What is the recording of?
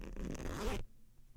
Opening and closing a zipper in different ways.
Recorded with an AKG C414 condenser microphone.